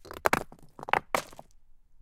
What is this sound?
Stein Aufschlag mit langem Decay 06
Recorded originally in M-S at the lake of "Kloental", Switzerland. Stones of various sizes, sliding, falling or bouncing on rocks. Dry sound, no ambient noise.
boulders, bouncing, close-miking, debris, fall, hit, movement, nature, sliding, stone